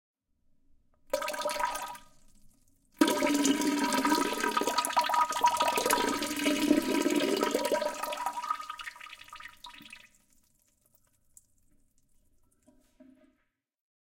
20190102 Pouring Water into Toilet 1
drain, pour, bath, pouring, water, bathroom, sink, liquid, toilet, drip